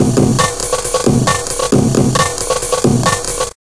3 ca amen
time stretched amen break
dnb, jungle